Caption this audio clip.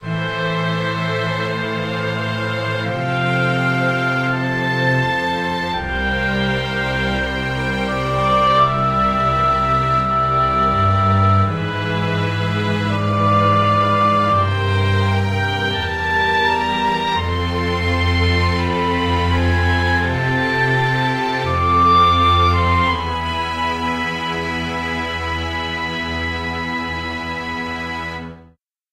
Ferryman (Transition)
Ferryman on a quiet river. Motif to use for a transition between scenes.
Although I'm always interested in hearing new projects using this loop!
classical, ferryman, motif, oboe, orchestra